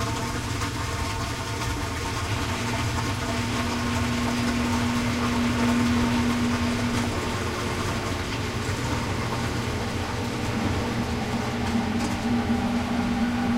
washing machine rinse cycle transition
Transition between rinse and wash cycle.